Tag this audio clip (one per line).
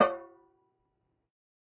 conga
god
home
open
real
record
trash